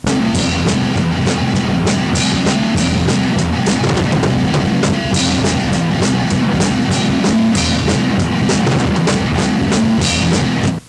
An assortment of strange loopable elements for making weird music. A snippet from the cult classic thrash band "Warfare" from a practice cassette tape circa 1987.
band, disorted, metal, noisy